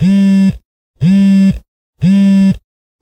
cell phone vibrate in bag loopable
Loopable recording of a Nexus 6 cell phone vibrating in a messinger bag. Recorded with my Zoom H6.